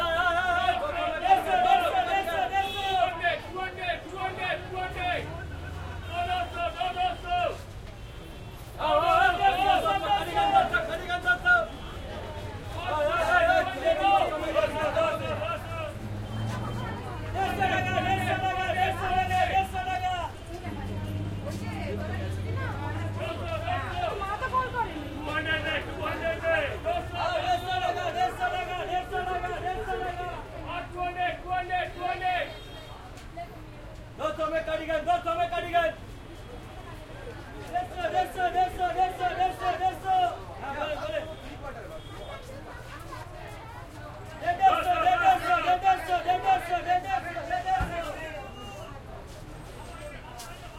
Kolkata market ppl selling cloths CsG
india, hindi, kolkata, shouting, selling, market